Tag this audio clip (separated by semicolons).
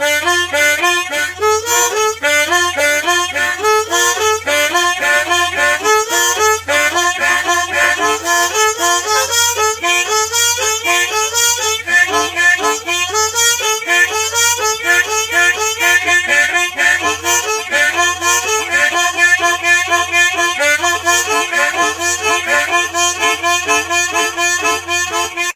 Music; small; melody; composing